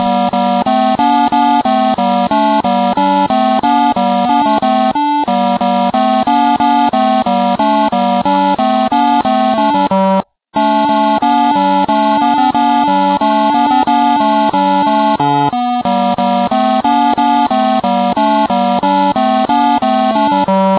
recording of a handheld game tronic device. i connected the device directly from the plate to the audio in of my computer. so the sound is kind of original. the batteries were little low, so the audio is mutated and sounds strange.
game, game-device, handheld, haribo, saw, synthetic, tronic